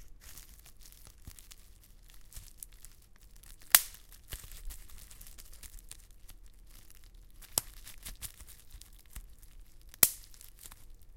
Popping bubblewrap
Ahhhhhhhhhhhhhhhh. So satisfying.